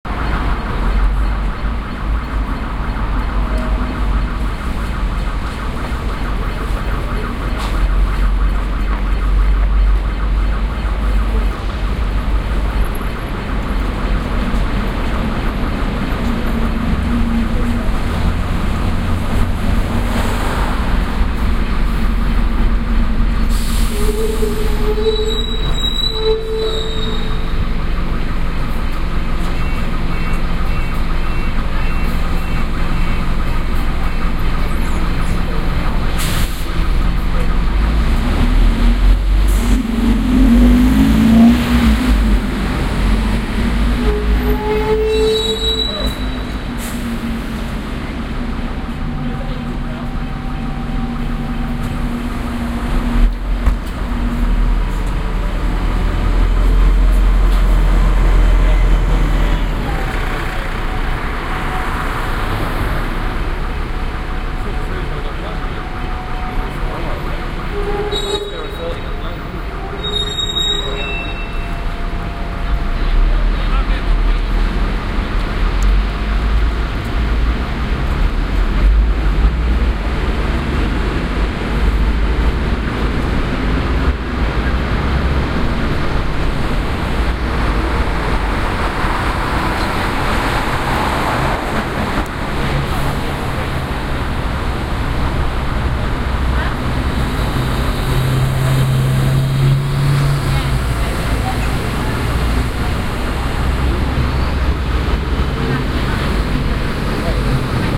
Moorgate - Bus arriving at stop